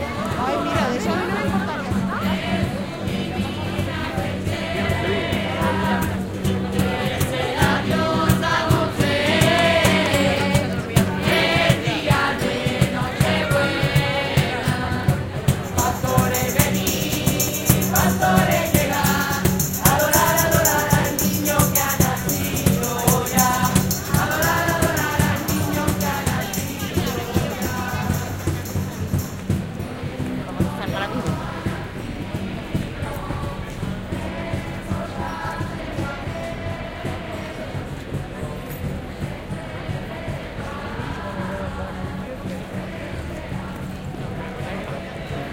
20061222.christmas.ambiance.02
street ambiance during Christmas at Tetuan St, Seville. Voices of people passing, and an amateur chorus in a street performance sings a traditional folk song